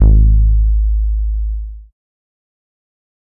Another Psy Goa trance base sample pack. The fist sample is just a spacer.I think it starts at E1. I have never seen a set of Psy base samples on the net, thought I'd put them up. if anyone has a set of sampled bass for Psy / Goa available, please tell me, I'm still learning, so these are surly not as good quality as they could be! Have fun exploring inner space!
electronic, goa, psy, sample, sub, trance